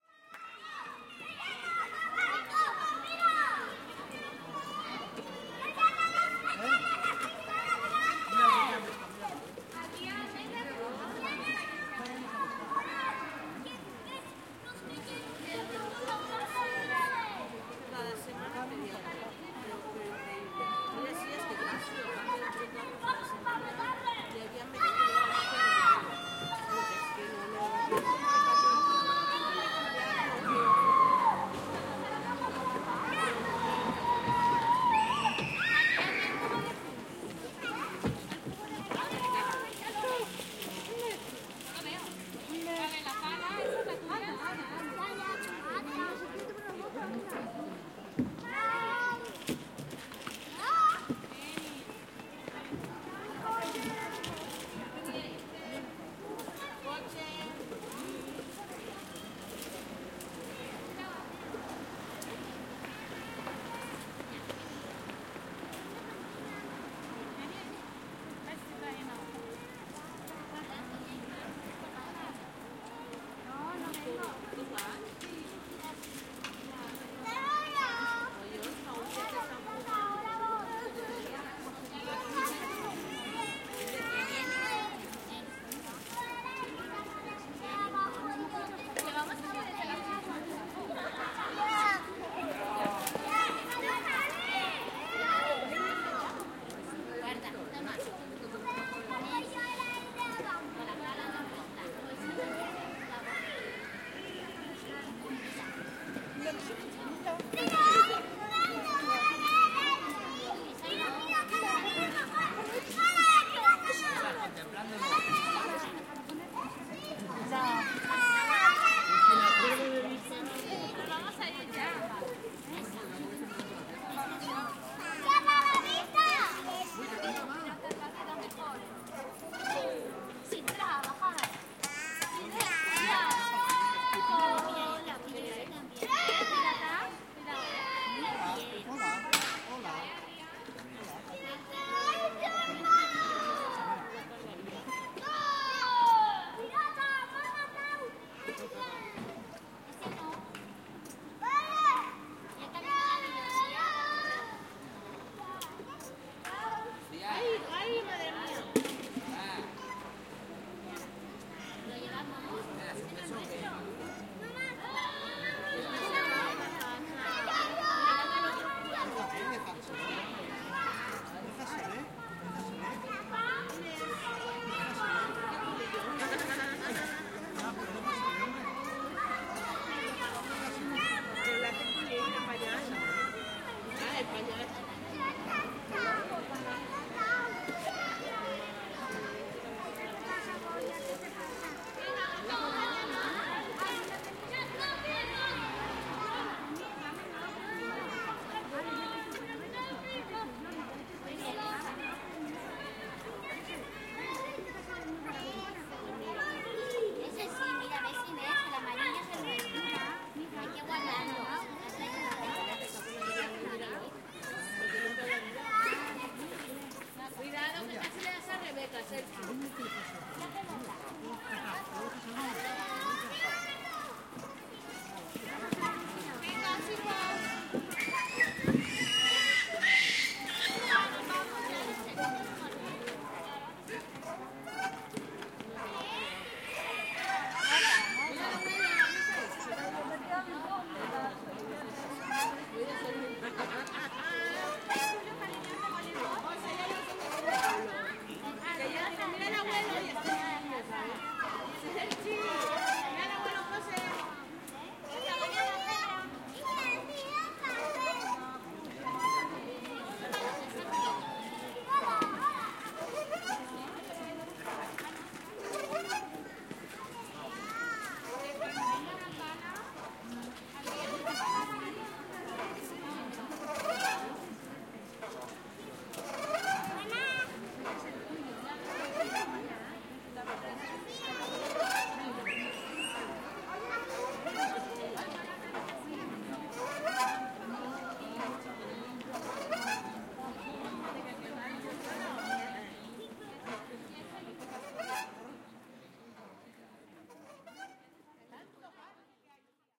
Recording a small playground with children and their parents in an autumn afternoon in Gandia Spain